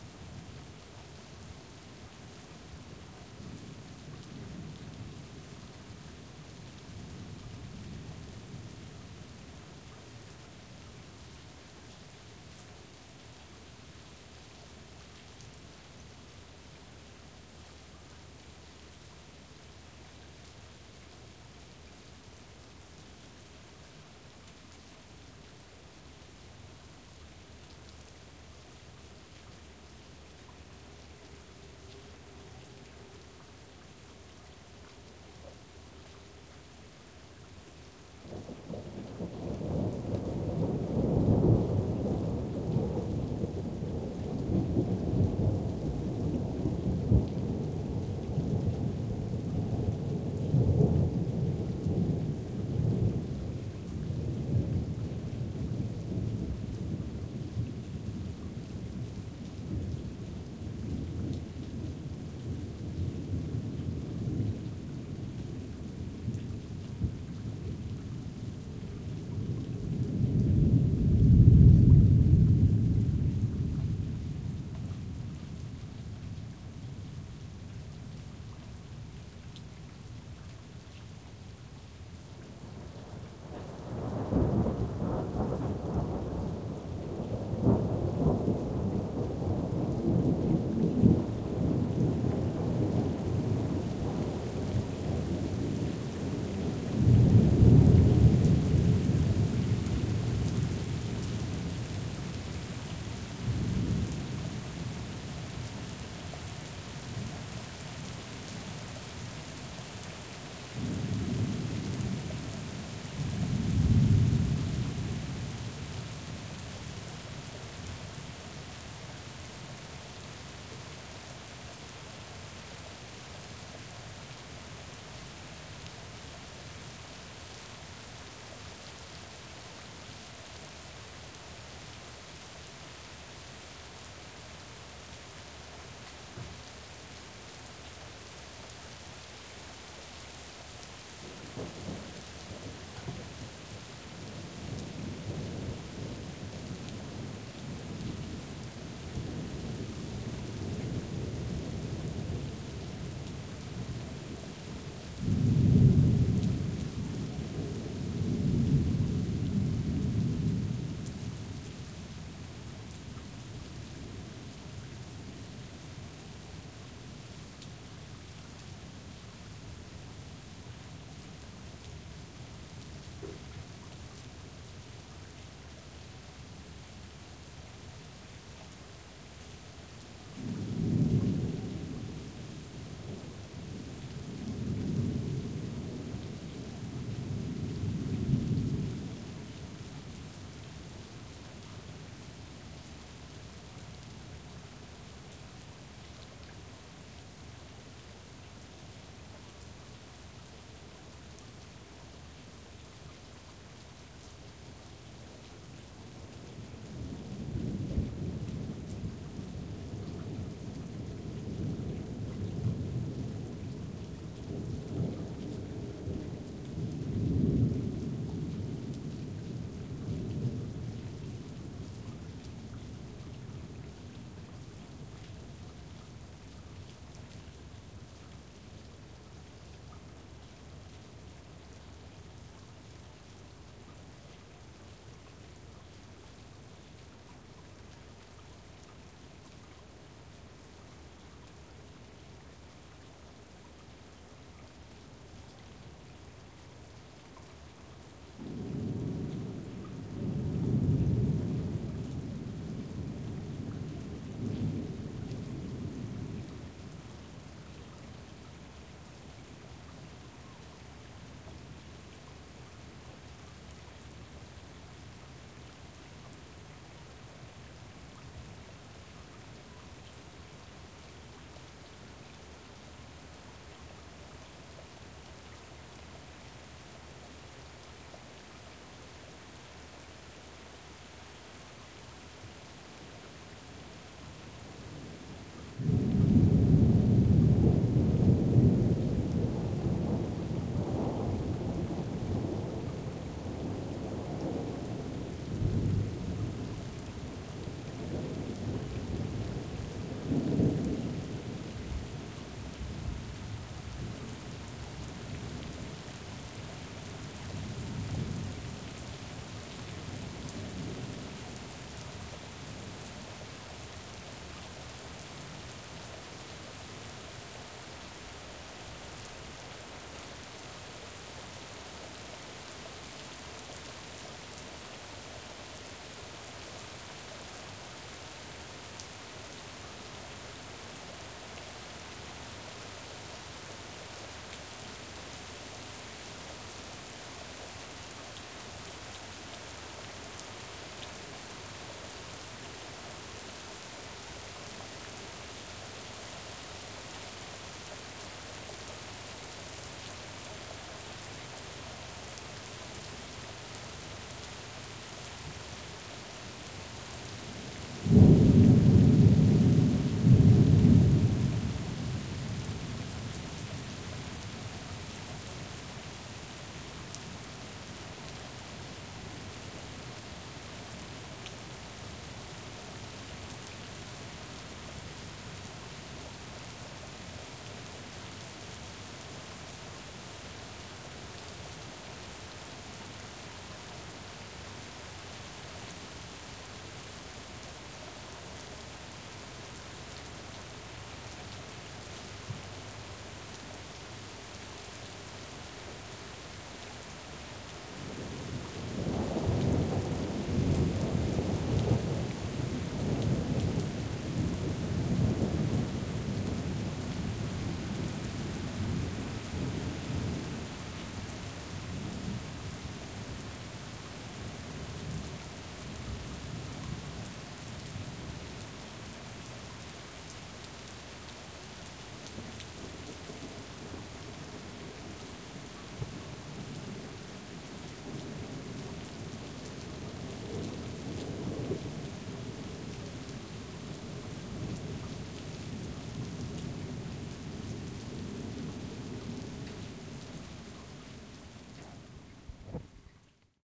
Galician Storm
h4n X/Y
ambience, rain, galiza, thunder, bird, storm, wind